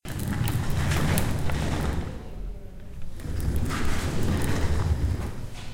Rolling chair at Ciutadella Campus library UPF